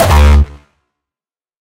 a Kick I made like a year ago. It has been used in various tracks by various people.